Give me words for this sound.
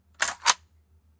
gun cock effect
cock, gun